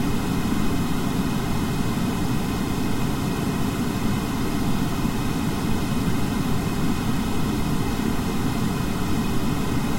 brown noise echochmbr
Brown noise generated with Cool Edit 96. Applied echo chamber effect.